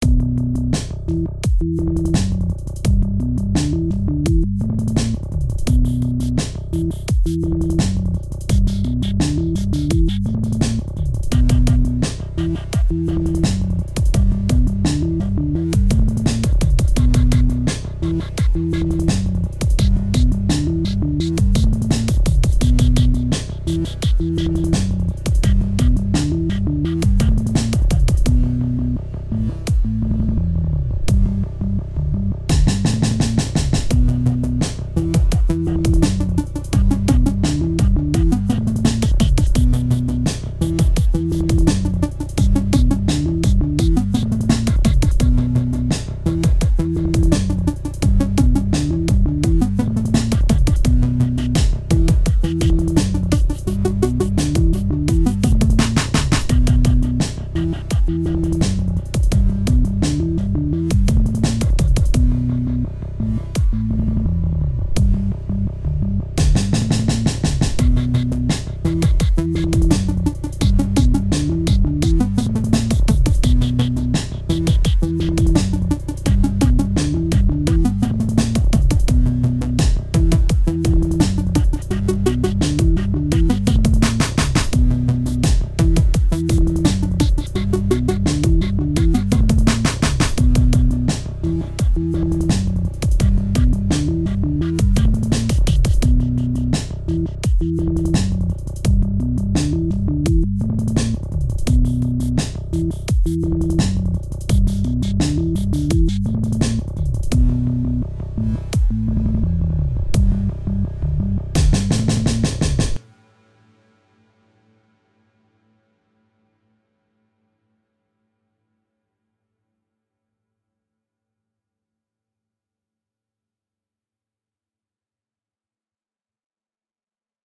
Synth Loop 1
Synth, Instrumental, Synthwave, Loop, Loops